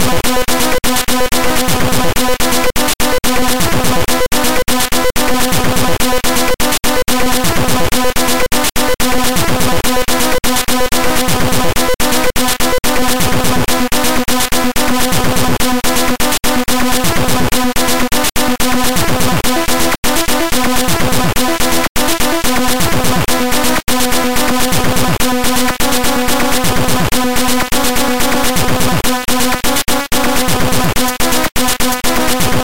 8bit Loop
electronic, 8bit, loop